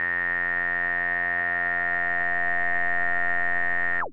Multisamples created with subsynth using square and triangle waveform.